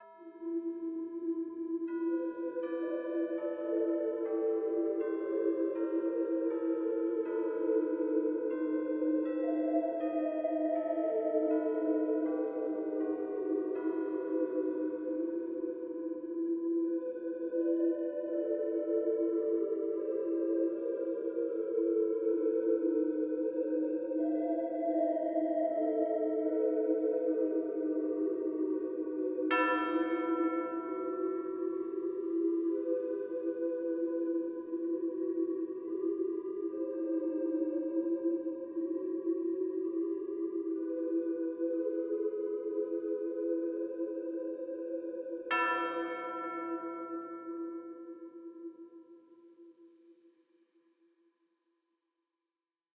Ethereal Toll
A track created for a creepy dungeon.
[Please note that I have no previous musical experience and have created these for a project for university]
music, soundtrack, videogame